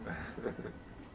people laughing outdoors 006
short laugh by a man outdoors